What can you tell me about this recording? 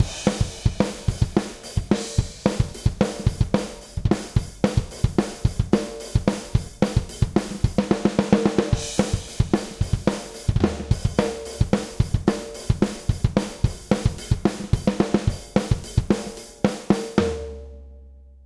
punk rock groove
220 bpm punk rock loops
beat, drums, groove, loop, punk, rock